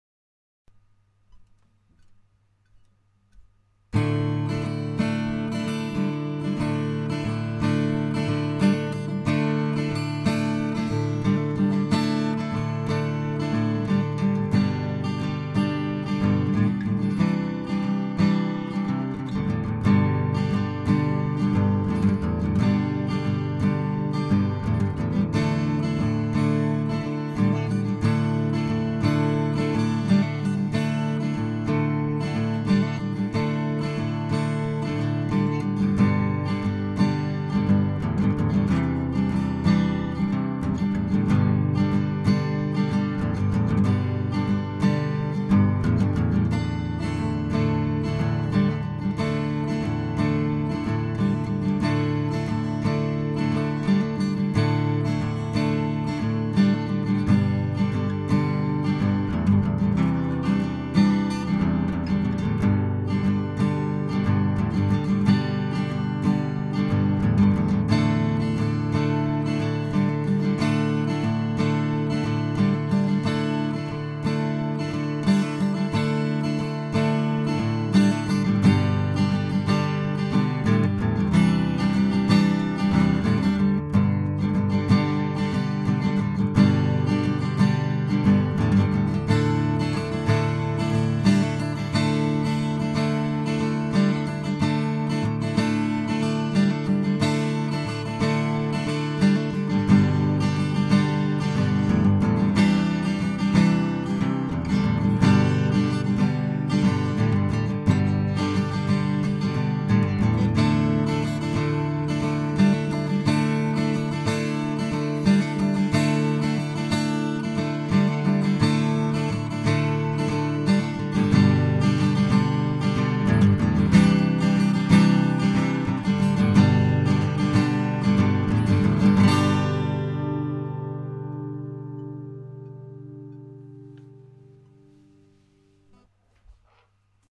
Easy acoustic song. Thrее сhorвs played fight. 4/4, Temp 90.
clean, open-chords, acoustic